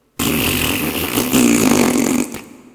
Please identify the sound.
Vocalised farting noise #3. Recorded and processed on Audacity 1.3.12